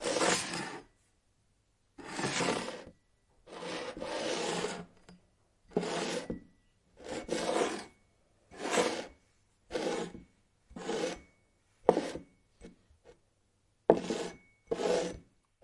Glass on wood table sliding
Variations on a glass full of water, half full of water and empty sliding at various paces on a wooden table.